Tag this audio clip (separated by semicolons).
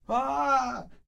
shout; scream; men